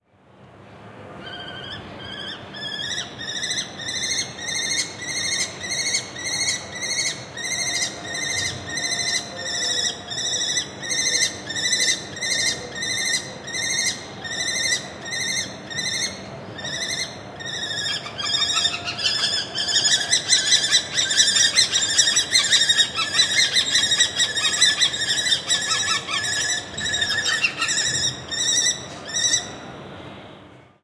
20190323.kestrel.male.female
A male Lesser Kestrel (Falco naumanni) screeching, then answer from the female in her own distinctive voice. City hum in background. Recorded in late afternoon on my rooftop, using Audiotechnica BP4025 into Sound Devices Mixpre-3 with limiters off.